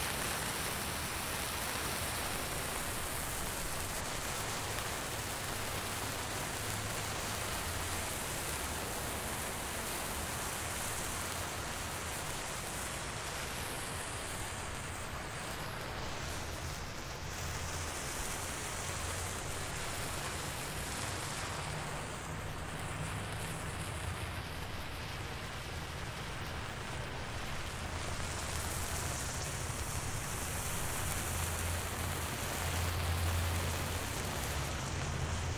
Road Flare Close Up Cars
fire, flare, burning